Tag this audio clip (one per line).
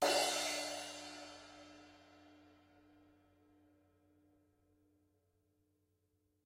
Cymbal
Crash
Drum-kit